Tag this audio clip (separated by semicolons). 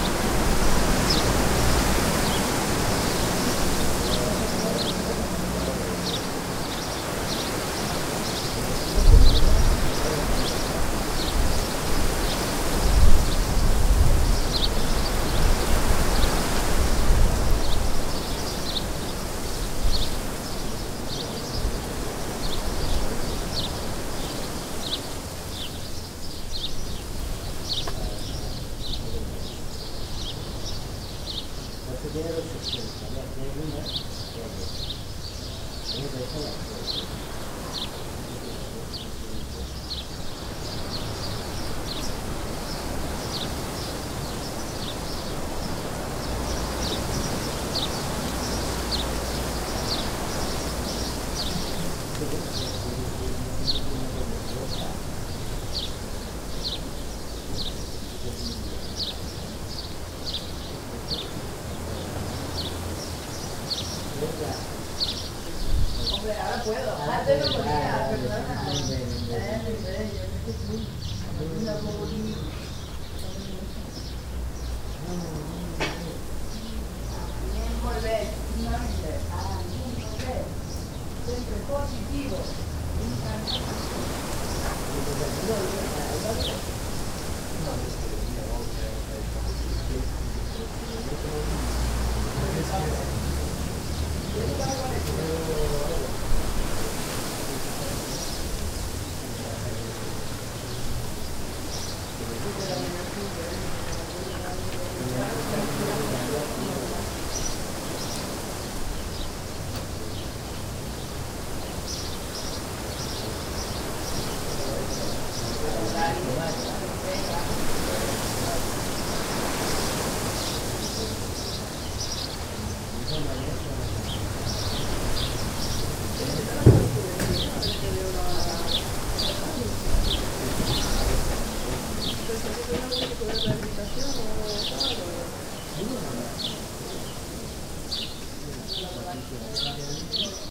bird; countryside; forest; nature; village